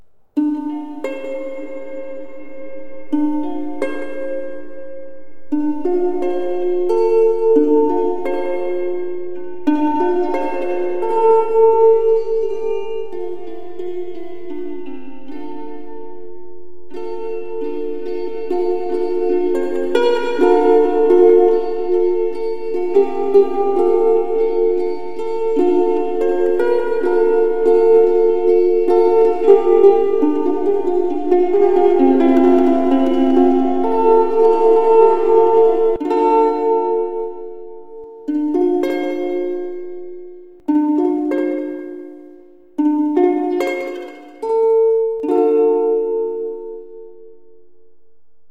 Spring Sleet song by James Marlowe
A sad slow song played on a ukulele
Free, Music, Royalty